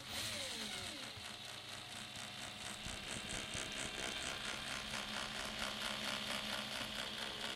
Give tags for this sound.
machine; whir; latch; mechanical; buzz

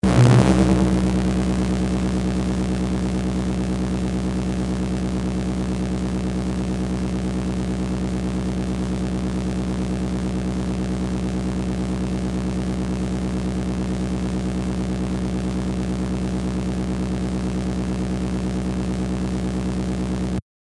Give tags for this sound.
machine; spacecraft; sfx; game; alien; science-fiction

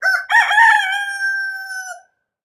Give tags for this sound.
animal; Austria; bird; chicken; cock; cockerel; crowing; dawn; Europe; field-recording; morning; processed; rooster

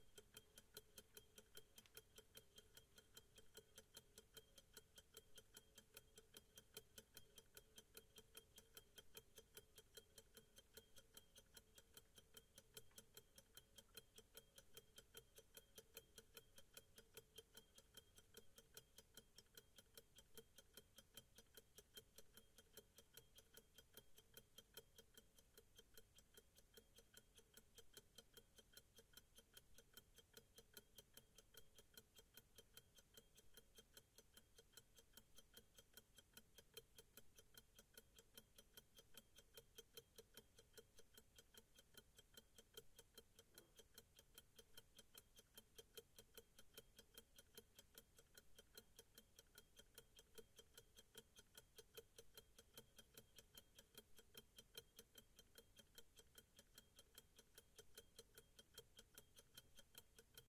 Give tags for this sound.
clock; pocket-watch; tick; ticking; tock; watch; wrist-watch

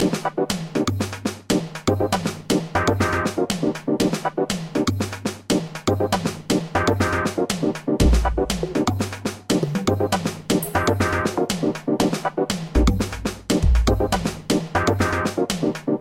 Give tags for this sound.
percussion-loop; music; groovy

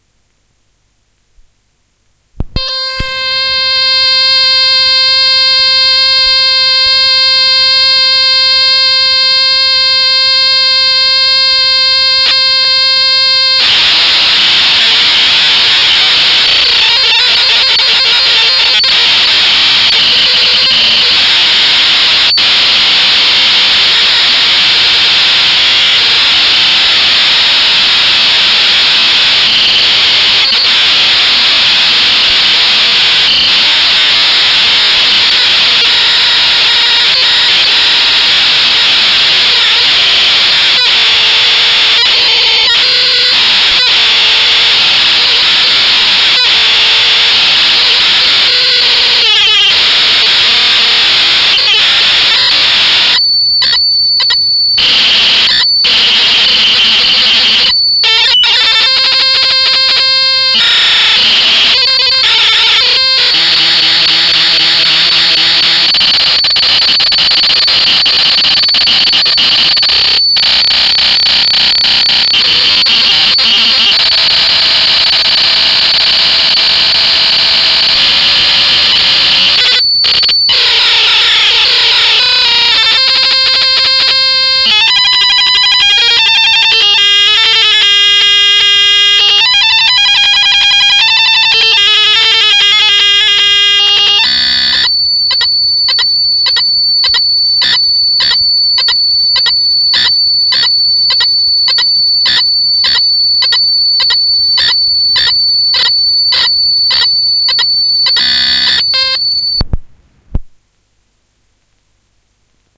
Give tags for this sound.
commodore; datassette

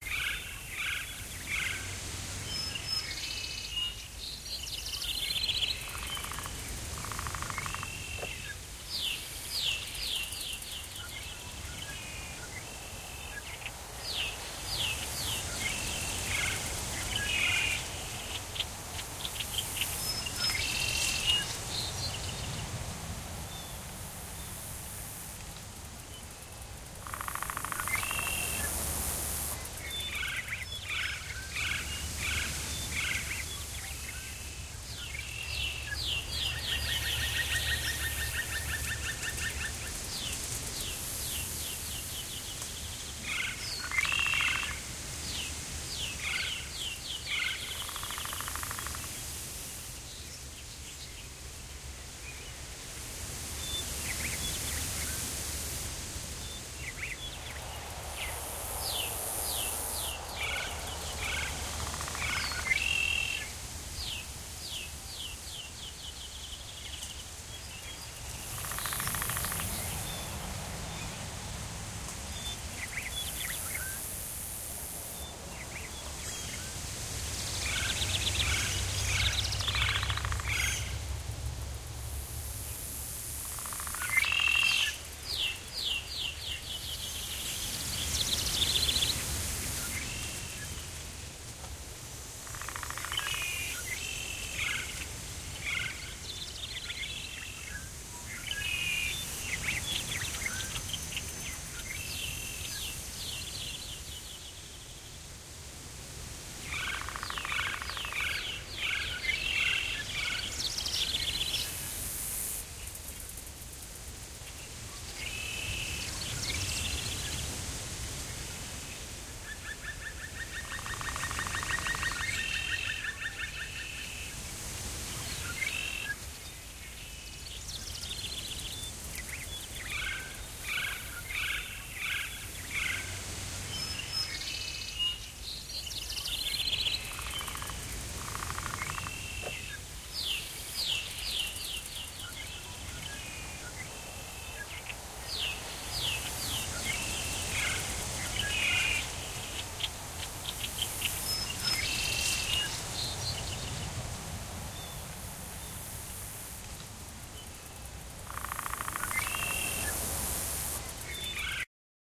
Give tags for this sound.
bird; Forest; wind; worm

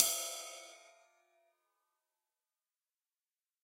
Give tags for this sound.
stereo; drums; cymbal

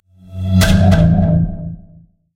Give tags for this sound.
intense; noise; short; damage; mistake; file; theater; swoosh; coming; glitch; computer; error; wrong; rolling

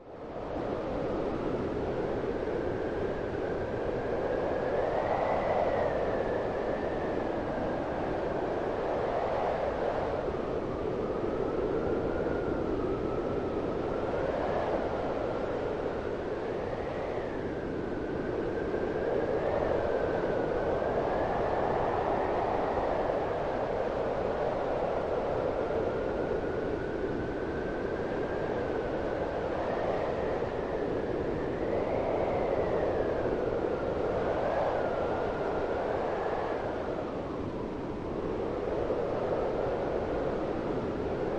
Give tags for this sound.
air; ambience; ambient; arctic; artificial; atmosphere; autumn; background-sound; blow; blowing; breeze; designed; draft; environment; field-recording; fx; general-noise; howling; nature; nord; north; sound-design; soundscape; storm; synth; weather; white-noise; wind; wind-machine; windy

